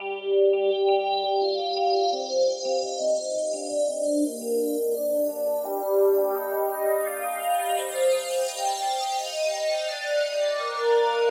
melody with sad pads and delay.
bass, phase, progression, strings, synth, trance